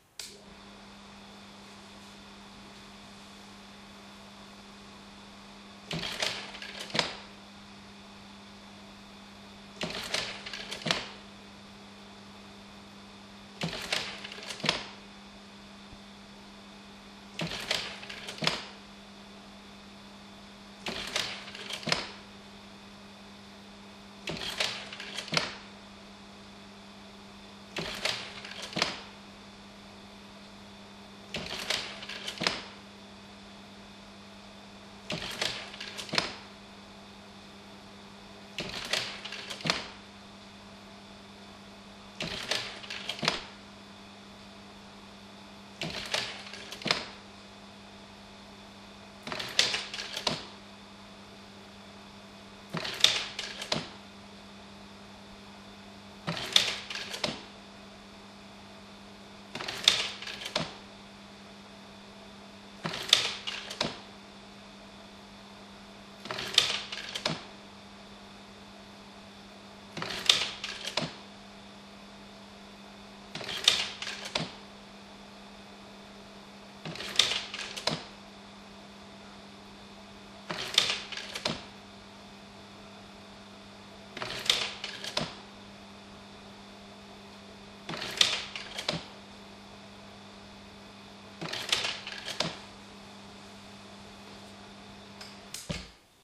The sound of an old carousel slide projector.